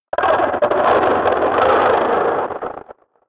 Glitch effect made with FL Studio.
2021.
drone, loop, vintage, sfx, harsh, experimental, terrible, glitch, dark, science, distortion, war, electronic, noise, radio, effects, old-radio, processed, synth, distorted